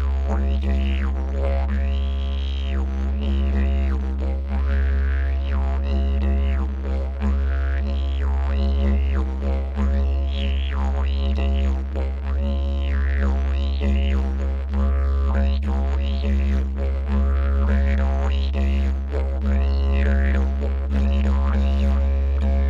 didge drone loop 01
Rhythmic recording, Didgeridu (tuned in C). Useful for world music or trance mixes. Recorded with Zoom H2n and external Sennheiser Mic.
wind, aerophone, didgeridu, loop, filler, rhythm, didgeridoo, didjeridu